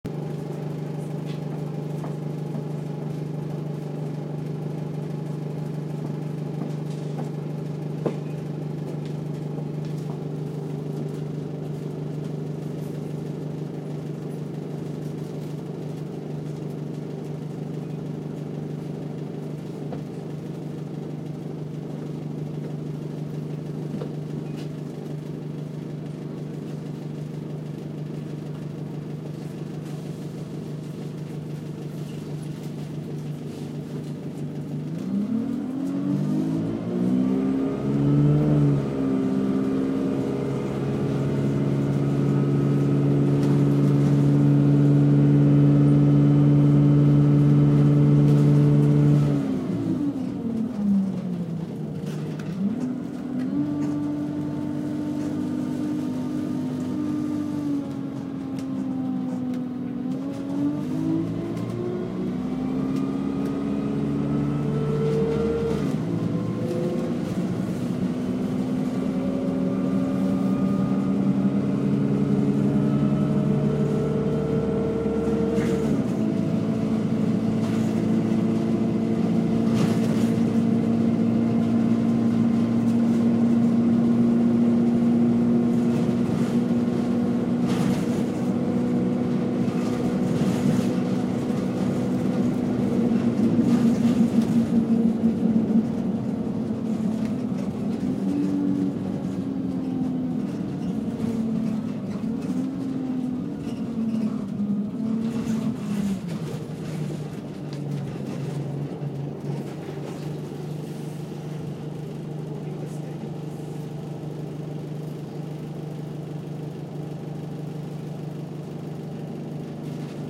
Bus starting driving stopping
The sound from inside a bus, starting, driving along and then stopping to let off passangers